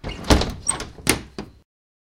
Natural sound when I close a window of my living room.
closing, window